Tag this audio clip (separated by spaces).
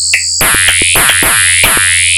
110-bpm electronic fm loop rhythmic